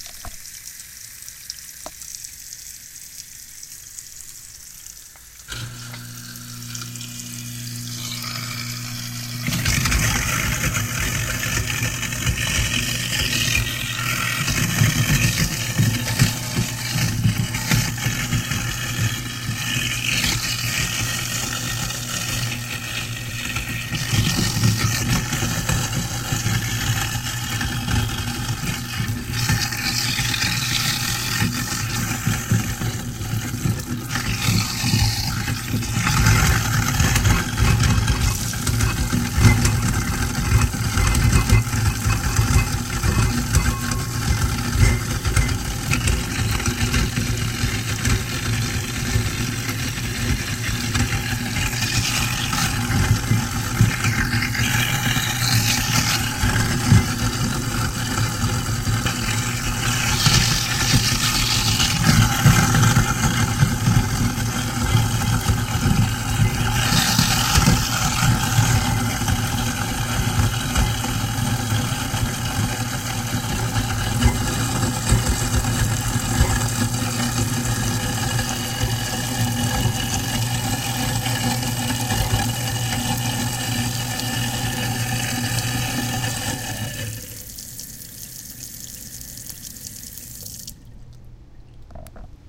A sink garbage disposal with water running. Numerous pieces of fruit rind were ground up. Some rattling of silverware is also present.